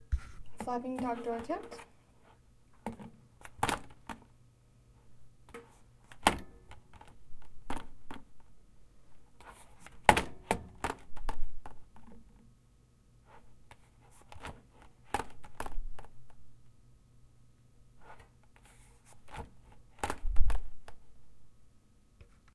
closing-door thump shut